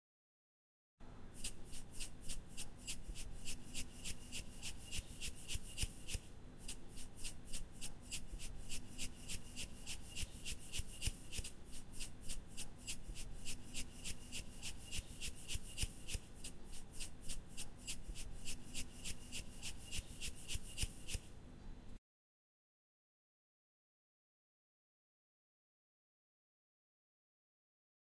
Salt Shaker Shaking
Shaker, Salt, OWI